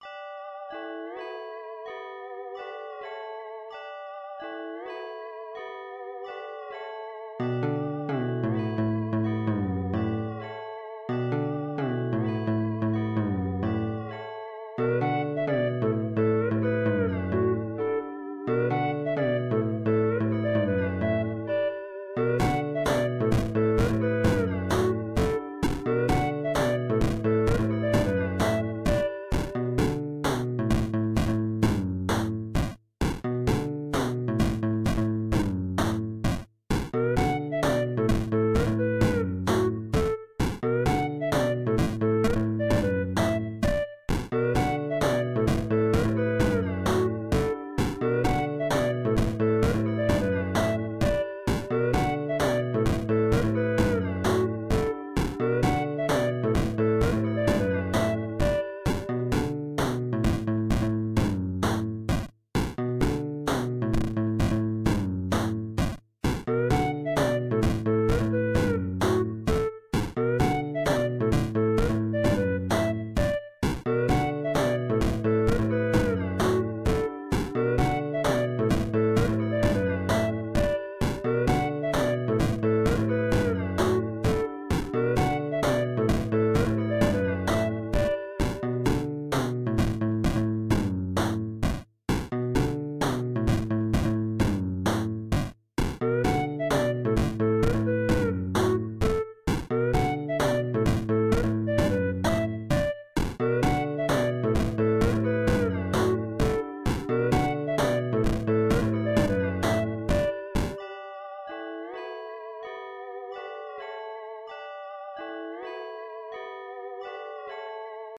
Nixuss Game - wait at the baja lake with the otter
(Wait at the lake with the otter) Yet another 'Chibi' song from Beepbox. I went for a mystical pond kind of sound that's kinda stupid and upbeat but eh... The presets were already there so I did what I could with them. I'm sure you'll find a use for it :)
loop, sparkly, beepbox, 8bit, music